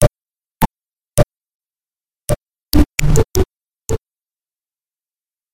Nursery 0bject count3
Another soft pillow HIT LOOP!
electric, effects, industrial, noise